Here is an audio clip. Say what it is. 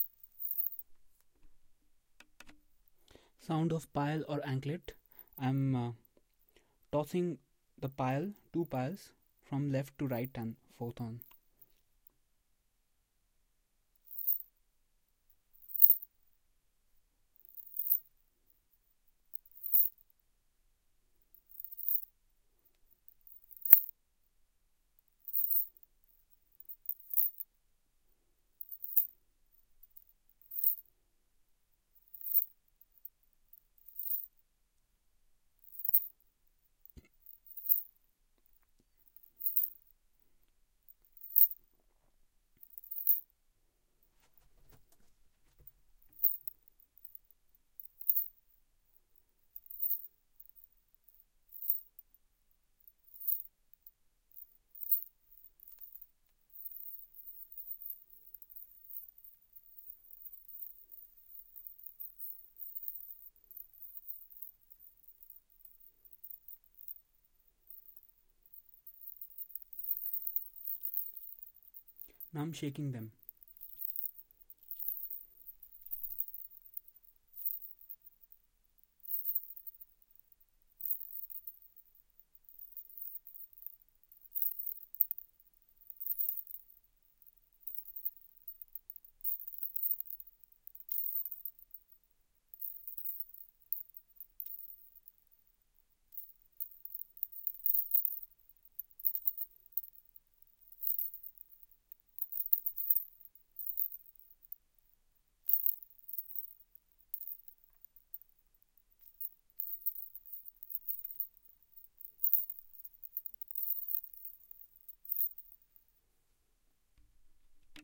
Payal Anklet Jewelery
Some jewellery sounds
Anklet, Jewellery, Payal